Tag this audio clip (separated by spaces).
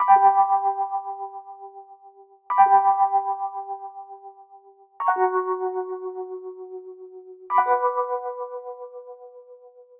ambient bell chill chords fun hip-hop keys loop progression summer synth trap tyler tyler-the-creator